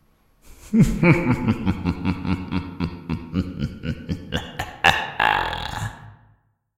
evil-laugh
This is a wicked laugh I did for a short film, its me in the basement and a Studio Projects C1 mic run through my compressor to add some depth and richness to the sound before the signal hit my sound card, once in my daw I added some reverb and called it a day.
laugh, laughing, echo-laugh, wicked-laugh, Halloween, evil, haunted-house, thriller, laughter, creepy, villain, scary, evil-laugh, bad-man, bad-guy, horror, ghost, evil-man, happy-villain, wicked